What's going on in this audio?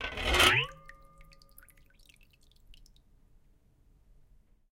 Foley pitchy pot top 08
Recorded the pitchy sound the top of a pot made when being submerged and taken out of water. Recorded on my Zoom H1 with no processing.